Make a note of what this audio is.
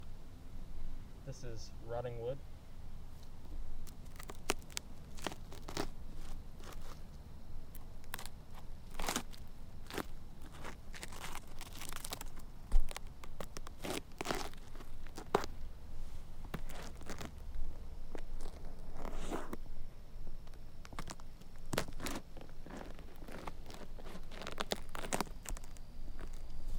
Rotting Wood
field-recording, nature, mono